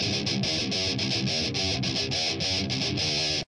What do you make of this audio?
THESE LOOPS ARE FLAY EQ SO YOU NEED TO DO THAT YOUR SELF SOME OF THEM ARE TWO PART LOOPS THAT NEED TO BE JOIND TOGETHER HAVE FUN AND LEAVE SOME FEED BACK
rock, groove, metal, heavy, thrash